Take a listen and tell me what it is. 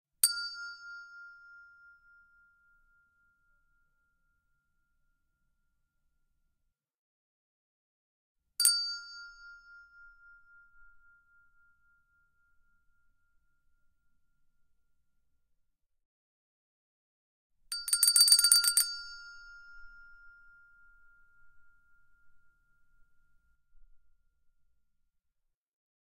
chromatic handbells 12 tones f1
Chromatic handbells 12 tones. F tone.
Normalized to -3dB.
double, single, bell, percussion, handbell, tuned, ring, English-handbells, chromatic, stereo